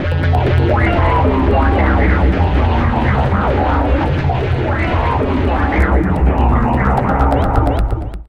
Snark Hunting 123bpm
Harsh acid bass sound.
acid, bass, harsh, leftfield